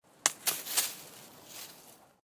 Stick Breaking sound from outside.